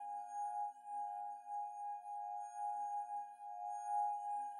crystal loop
Made to represent glass or crystal harmonic sounds. Also uploaded a separate version with an additional low-pitched hum. Reminiscent of chest or other point-of-interest proximity sounds in major video games
Recombined numerous times to give it a more homogenous sound, and edited for seamless looping.
harmonic, loop, glass, crystal